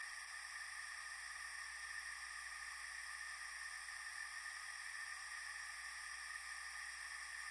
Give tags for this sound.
channel
feedback

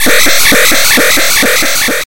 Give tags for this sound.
8bit
alarm
videogame

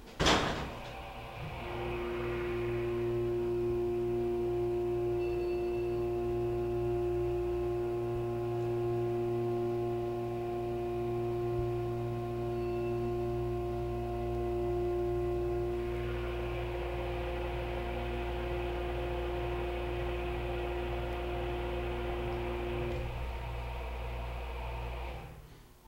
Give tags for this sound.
ambient
moving

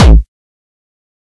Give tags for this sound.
bass
beat
distorted
distortion
drum
drumloop
hard
hardcore
kick
kickdrum
melody
progression
synth
techno
trance

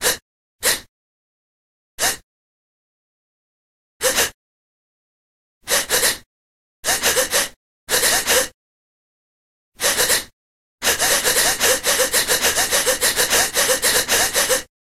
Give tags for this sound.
breath
multiple
rhythmic

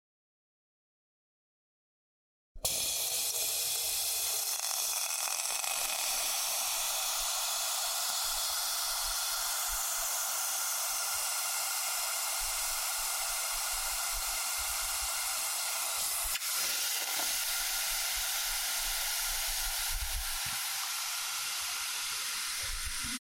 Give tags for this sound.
cook
cooking
food
fried
oil

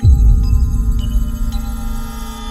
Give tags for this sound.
signal
message-tone
menu

